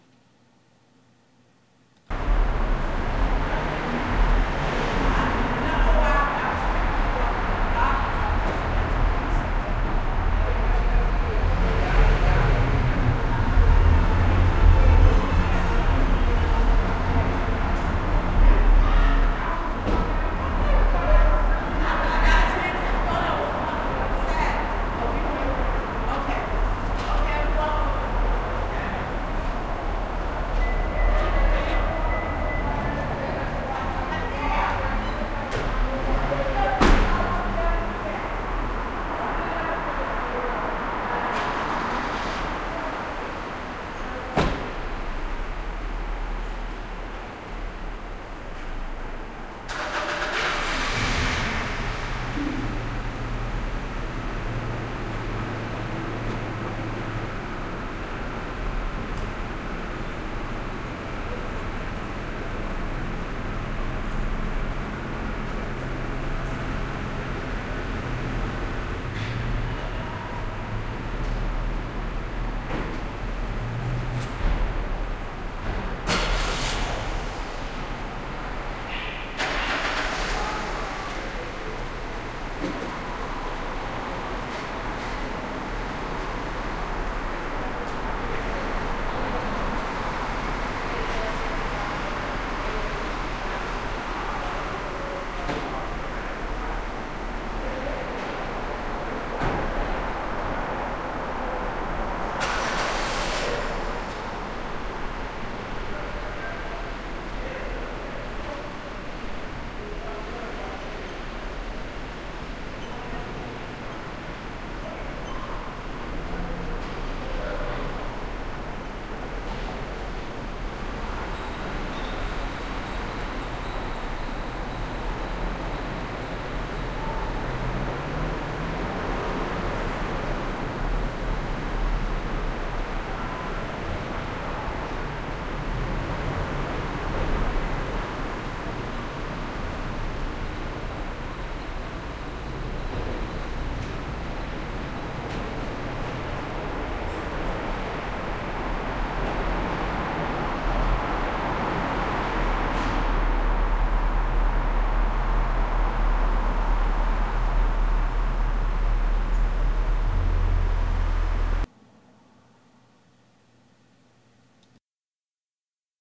RG Open Parking Garage
Stationary recording of the ambiance of a open two level garage.